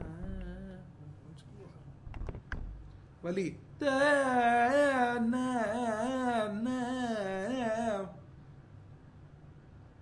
Kalyani - Vali
In this recording, done at IIT Madras in India, the artist performs the Vali gamaka several times. This gamaka feels like a circular movement.
carnatic, compmusic, gamaka, india, music